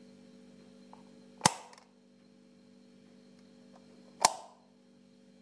open light close light
open a light button in room